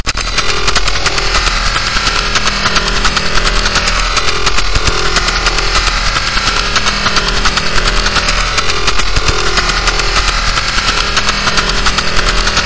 American artillery radar Scunkwork Rr2021